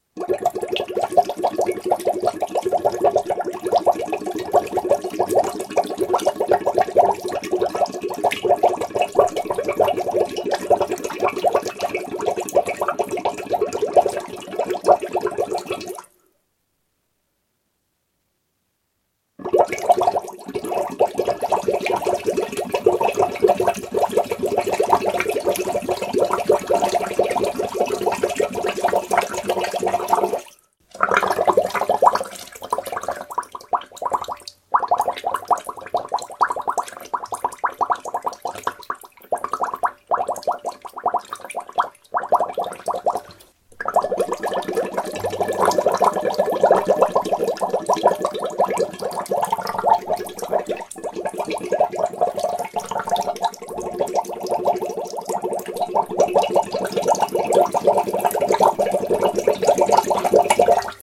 Set of water sounds we made for our sound library in our studio in Chiang Mai, North Thailand. We are called Digital Mixes! Hope these are useful. If you want a quality 5.1 or 2.1 professional mix for your film get in contact! Save some money, come to Thailand!